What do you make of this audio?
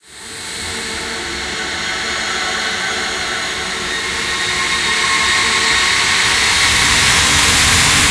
suspense short
A short build up of suspenseful sound ending at the loudest volume
suspense, cinema, movie